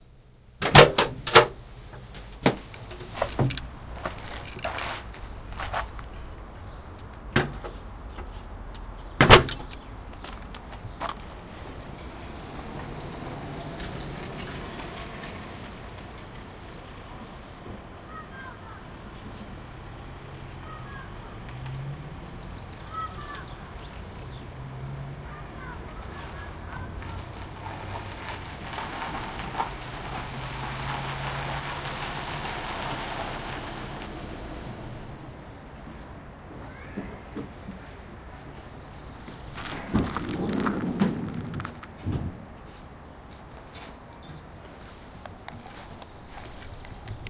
house door opens, exit outdoors, house door closes. car arrives. car door. recorded with zoom h2n and slightly edited with audacity. location: Riihimaki, Finland date: may 2015